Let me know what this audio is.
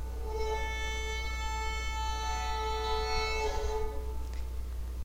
Tenor Nyla A5
A bowed banjo from my "Not so exotic instruments" sample pack. It's BORN to be used with your compositions, and with FL Studio. Use with care! Bowed with a violin bow. Makes me think of kitties with peppermint claws.
Use for background chords and drones.
banjo, varazdinpeppermint, puffball, violin-bow, calm, serene, bowed